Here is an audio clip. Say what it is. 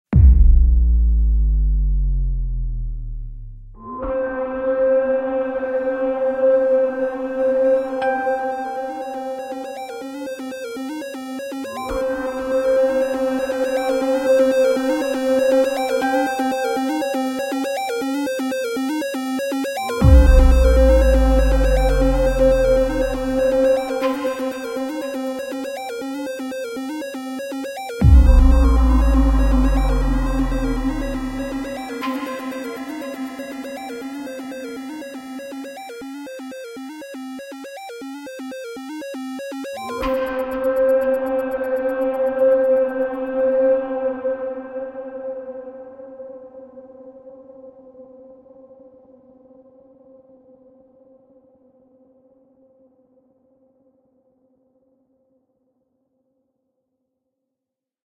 "Another Realm" - Short Ethereal Track
Either decision will work. Make sure you link your creations with this music in the comments!
ethereal, futuristic, science-fiction, sci-fi